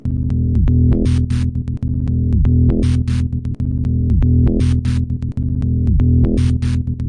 plucked bass string: processed in audacity
Bass, Rhythmic, Suspense